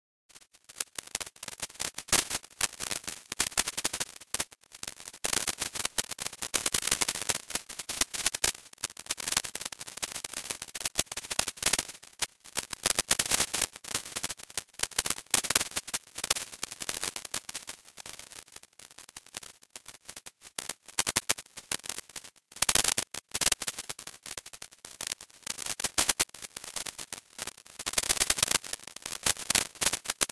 Synthesized granular noise.